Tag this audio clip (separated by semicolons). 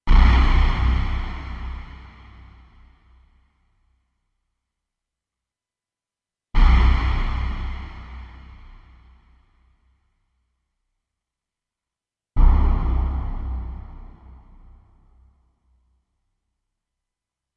explosion; cinematic; synth